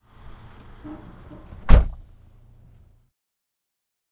peugot 206 car interior close door
Recorded with a Sony PCM-D50 from the inside of a peugot 206 on a dry sunny day.
Drives closes the door.